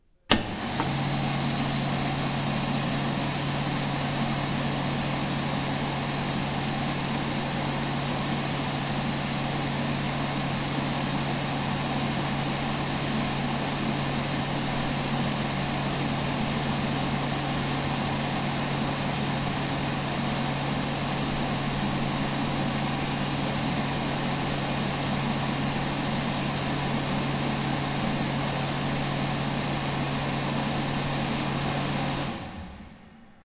Hand dryer in a toilet at at Poblenou Campus UPF.
Campus, dryer, electric, Hand, Poblenou, toilet, UPF